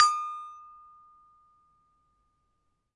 Sample pack of an Indonesian toy gamelan metallophone recorded with Zoom H1.
gamelan, hit